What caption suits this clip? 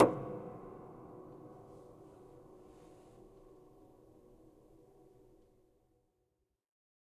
hits on the piano with sustain pedal "on" to complete a multisample pack of piano strings played with a finger